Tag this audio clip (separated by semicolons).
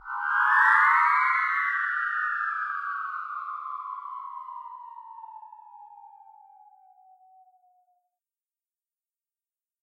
Cry; Scream; Ghostly